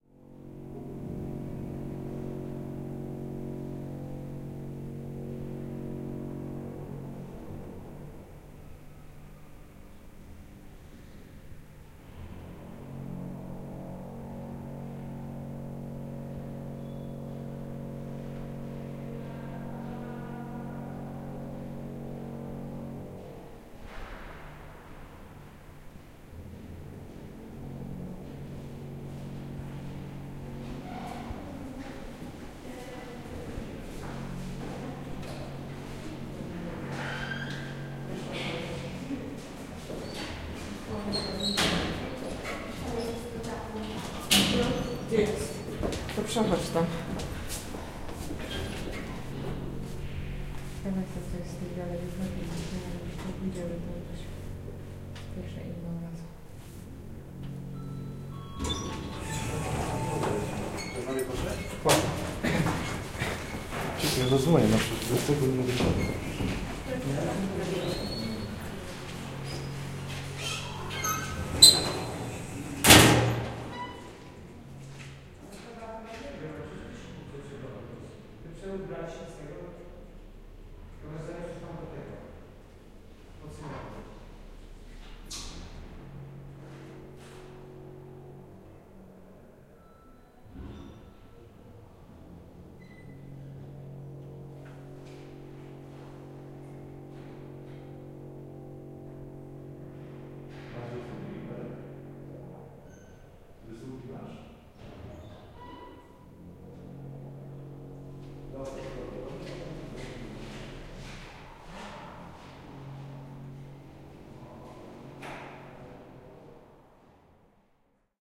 cc castle elevator 031111
03.11.11: about 14.30. In fron of elevator in the Cultural Center Castle in Poznan/Poland. Sw. Marcin street. Sound of the elevator, people's steps, voices. In the background sound of the renovation.